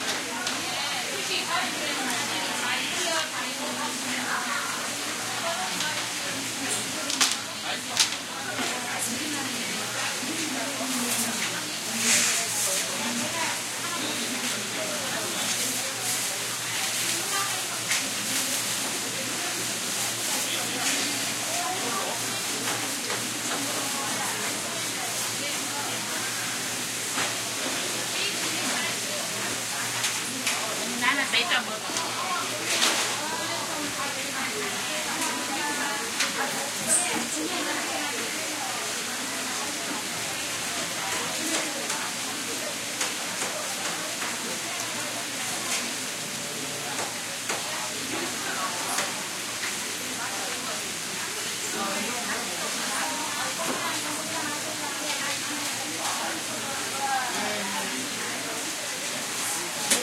Jagalchi Fish Market, Busan, Republic of Korea
Ambient sound recorded at the famous Jagalchi Fish Market in Busan, S. Korea.
Korean, fish-market